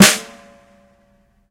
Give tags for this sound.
bottom snare

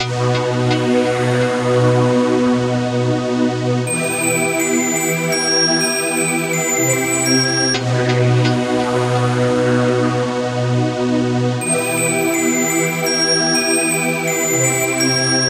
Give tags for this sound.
ambient loop space

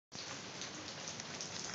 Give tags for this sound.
drizzle field-recording light loop nature rain rainfall raining shower water weather

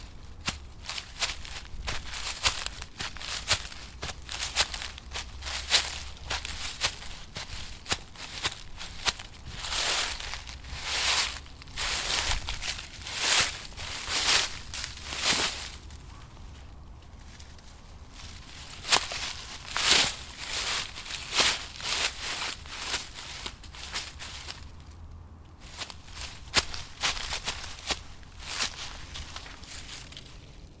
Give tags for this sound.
crunch forest leaves rustle

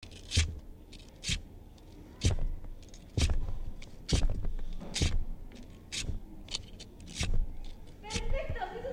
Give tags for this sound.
efectos sonoros

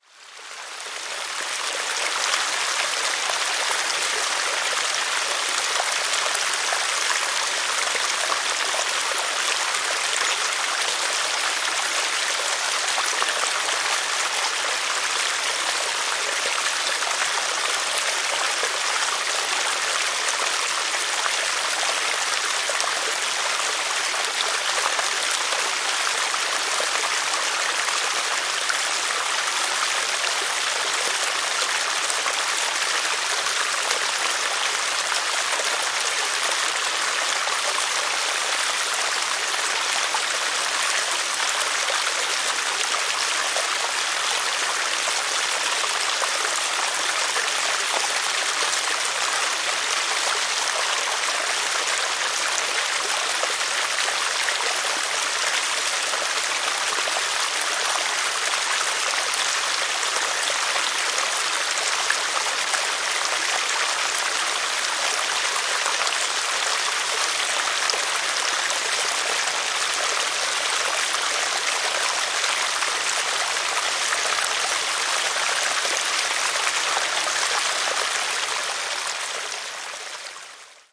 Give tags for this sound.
ambient belo-horizonte bird birds brazil cachoeiras field-recording forest minas-gerais morning night rain river rural stream water waterfall

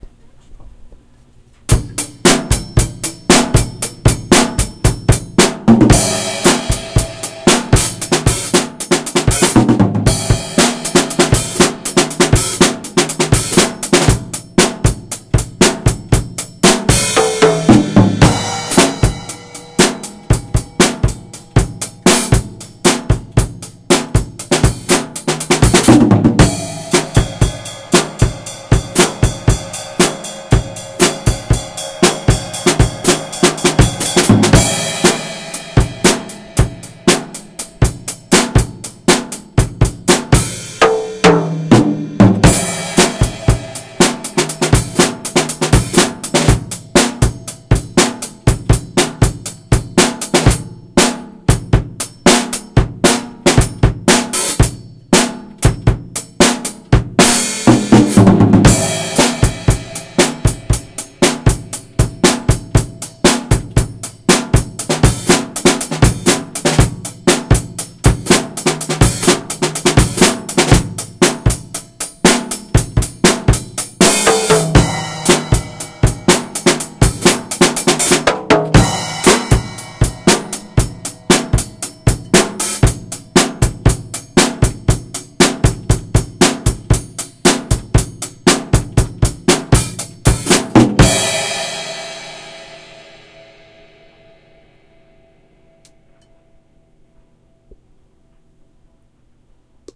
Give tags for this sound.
drum drumming music

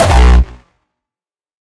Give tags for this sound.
dong c hardstyle sylenth1 raw virus harhamedia tr-909 access drumazon 909 roland kick rawstyle

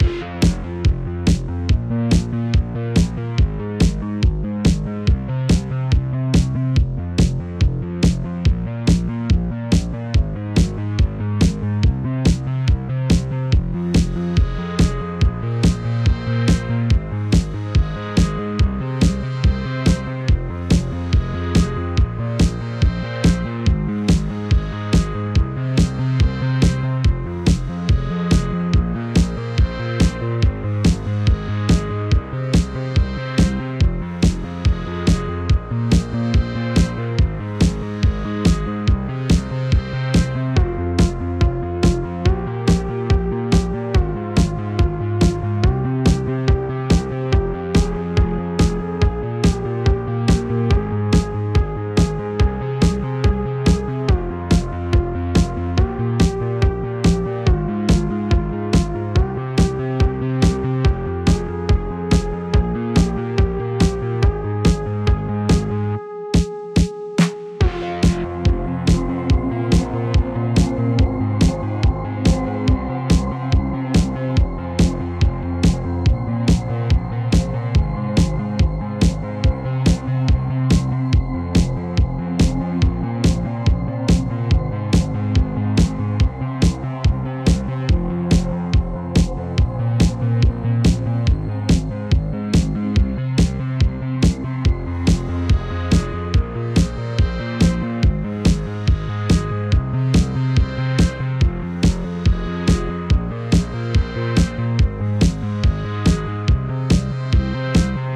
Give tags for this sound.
80s Ableton Bass Bassline Beat Beatbox Dance Drum Drumloop Elecro Electro Electronic Electronica Groove Kick Loop Moog Music Original-Music Reason Remember Retro Snare Synth Vintage pop sound

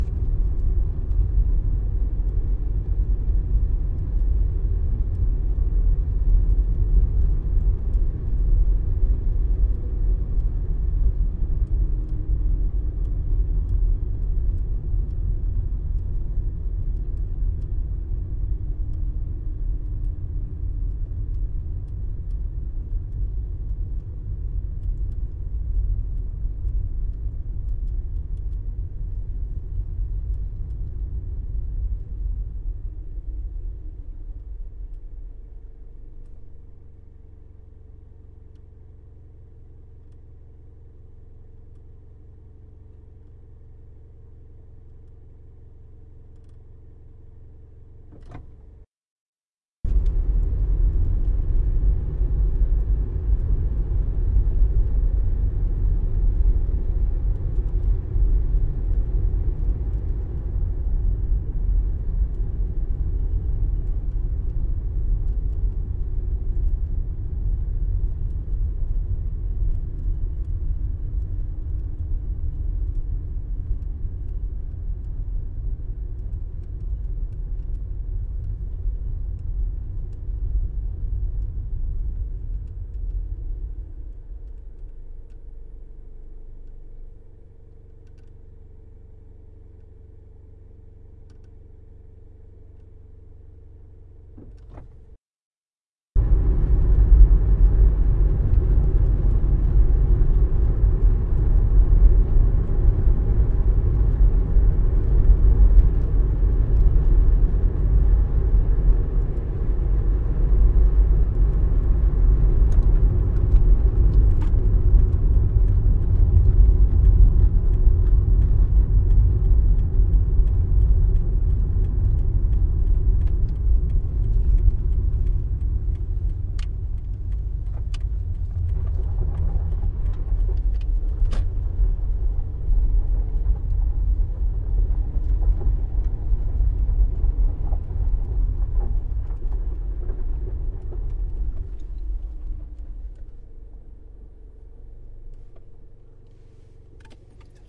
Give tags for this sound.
car country-road slow-moving

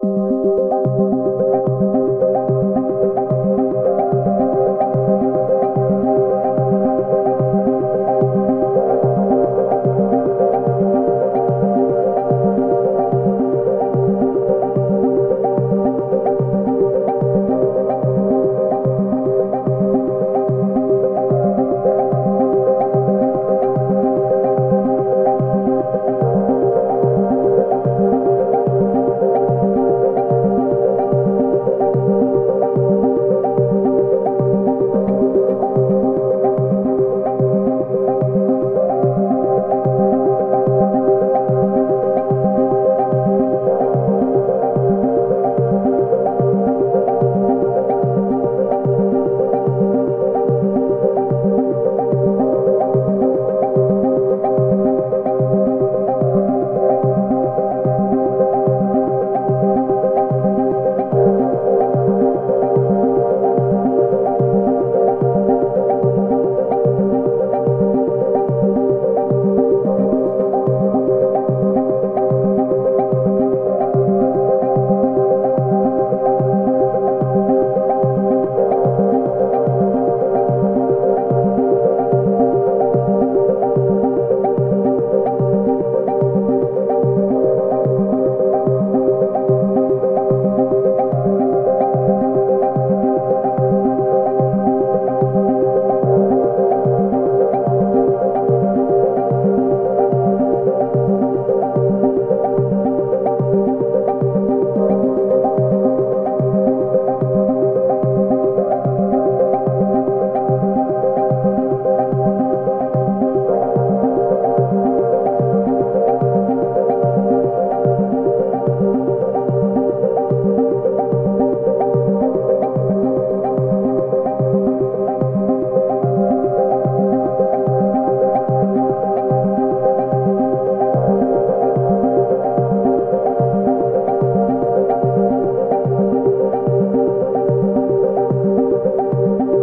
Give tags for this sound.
ambience ambient atmosphere Atmospheric Captivating Edge electro electronic Ethereal Haunting intro Minimal music of-your-seat Otherworldly pad Perfect-mood Smooth-transition Suspenseful synth Tension Vast

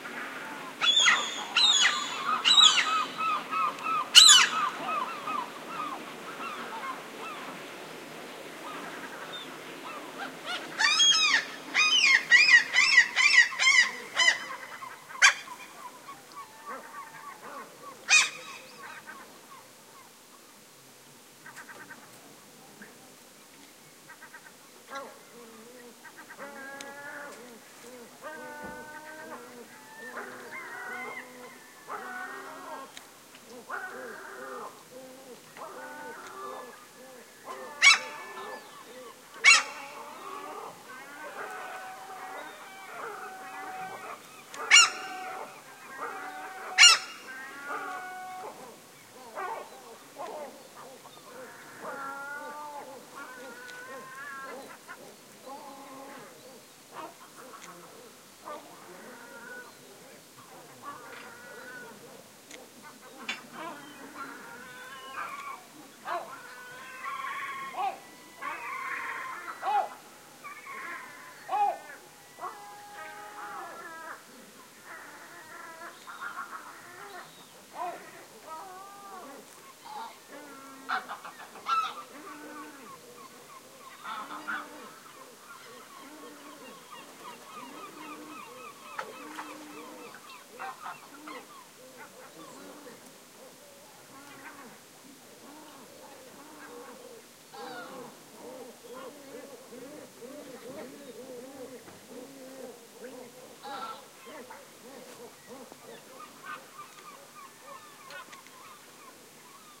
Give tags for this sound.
ocean seabird seagull screech shrill harbor cry nature field-recording call

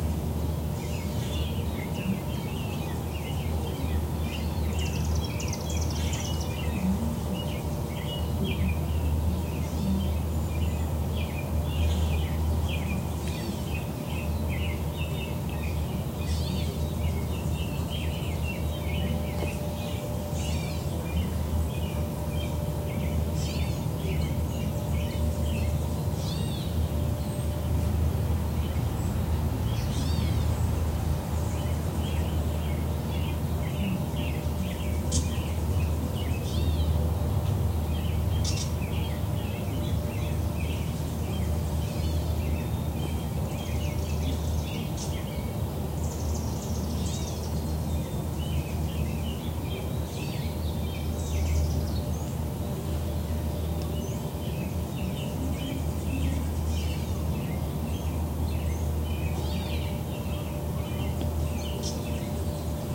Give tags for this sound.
ambience America American-robin atchison backyard birds birdsong chimney-swift field-recording Kansas nature out-the-window small-town tv US

